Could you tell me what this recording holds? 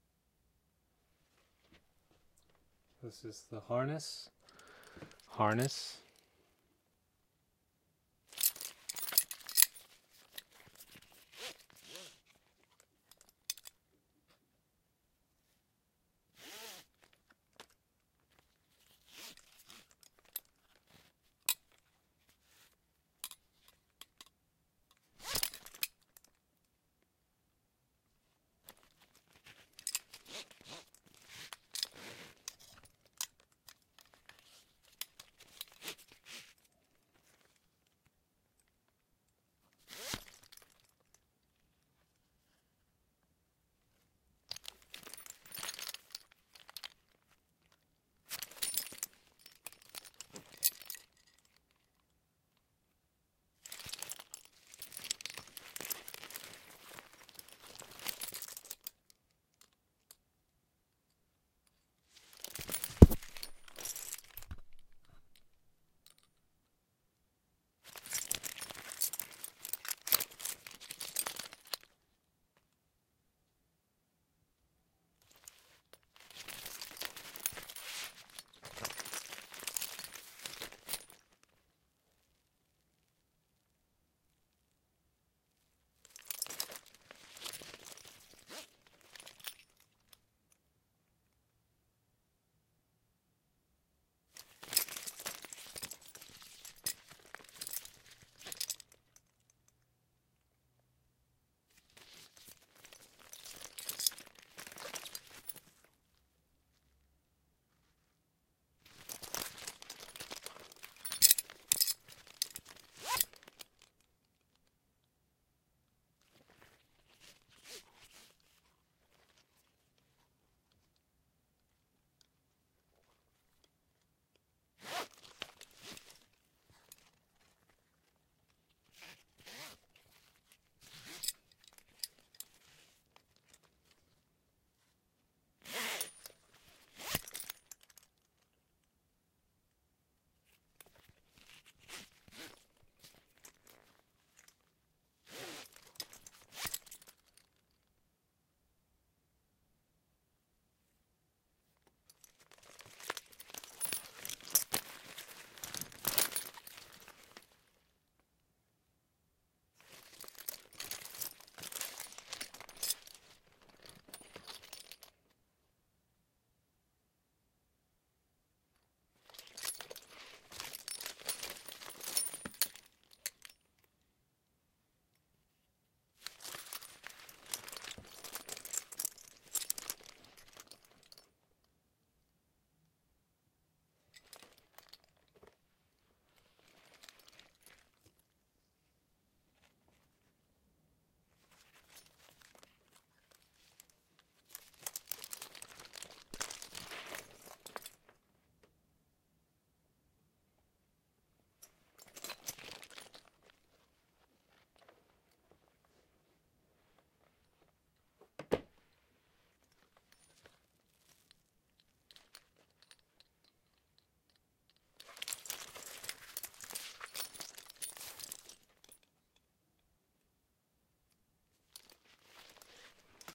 harness handling foley
foley, handling, harness